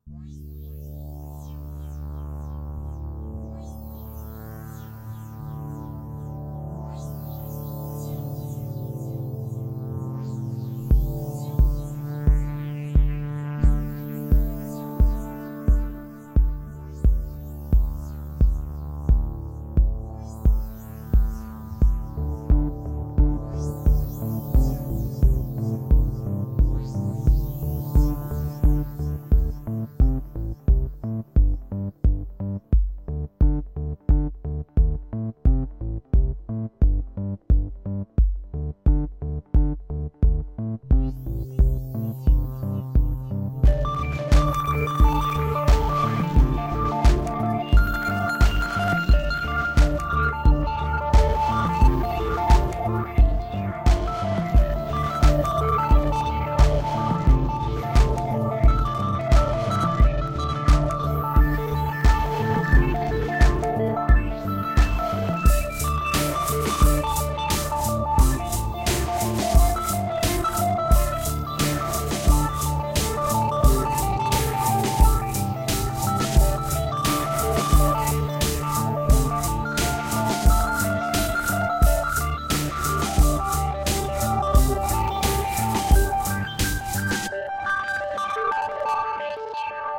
Arturia Minibrute + Korg M3 + Drums
88 BPM